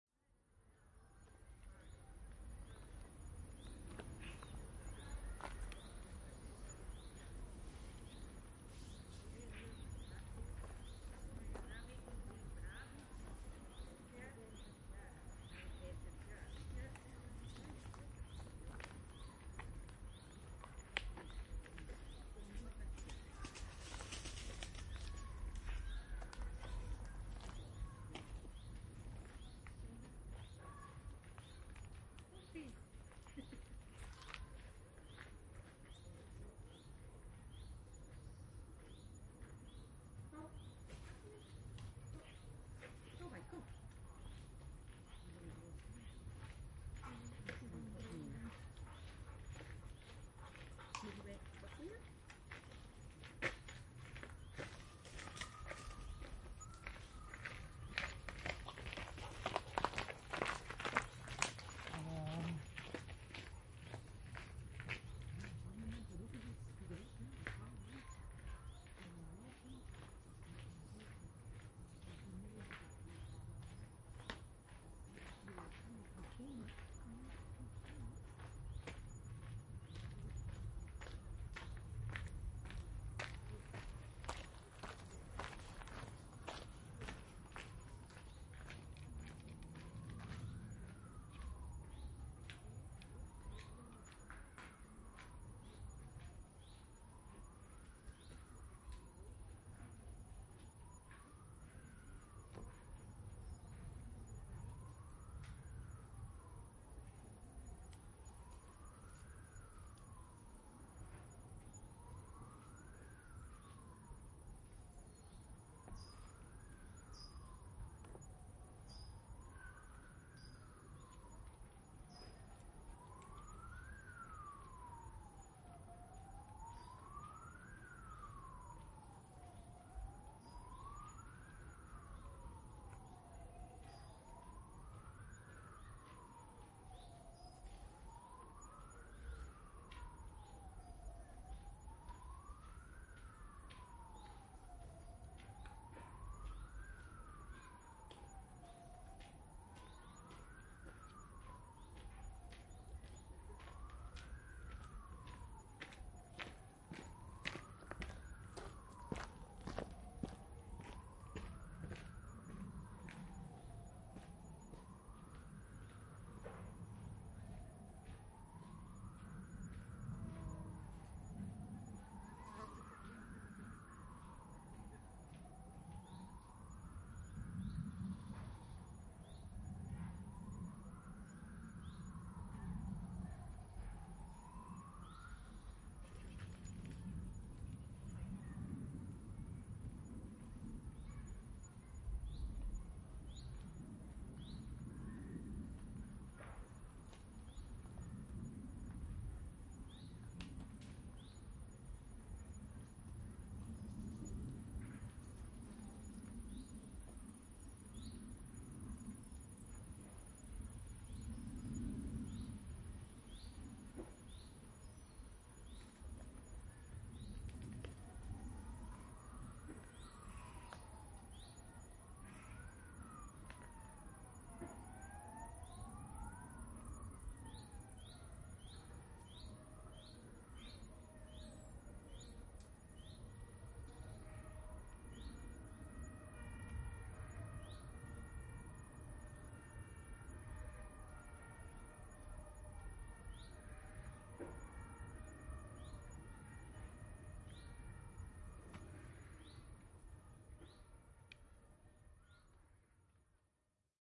Suburban park gravel walking Flemish talking siren ambulance [Borgoyen Gent July 2020]
Suburban, walking, ambulance, Flemish, park, gravel, talking, siren